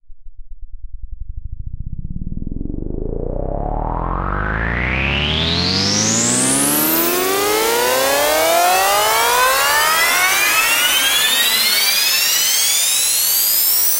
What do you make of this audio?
Riser Pitched 07
Riser made with Massive in Reaper. Eight bars long.
percussion, techno